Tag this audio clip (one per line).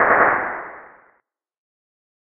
shot
shoot
gun
shooting
sfx
rifle
distant
weapon
gunshot
pistol
firing